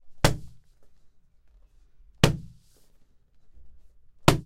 A book against the floor.
book, floor, foley